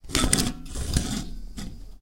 junk box002d
A heavy sliding noise.